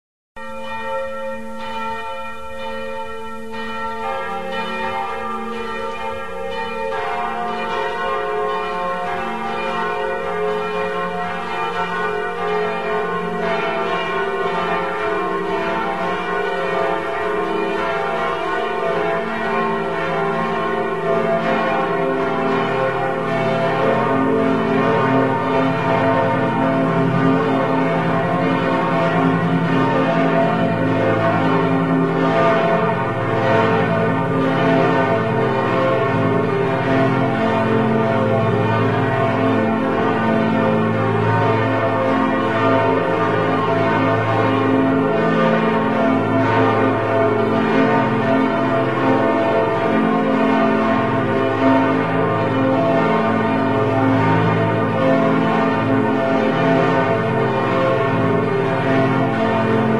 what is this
Lübeck domglocken
the bells of the lubeck cathedral in germany . the sound is a video edited to convert it in audio . recorded with a BlackBerry phone
Dom, field-recording, germany, glocken, lubeck